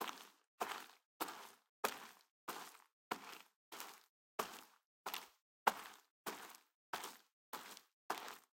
Footsteps Gravel+Dirt 2
Boots, Dirt, effect, Foley, Footstep, Footsteps, Grass, Ground, Leather, Microphone, NTG4, Paper, Path, Pathway, Rode, Rubber, Run, Running, Shoes, sound, Stroll, Strolling, Studio, Styrofoam, Tape, Walk, Walking